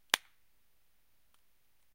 Title: Backyard
Date: ~07.2016
Details: Recorded reverb with starter pistol outside.
echo, impulse, ir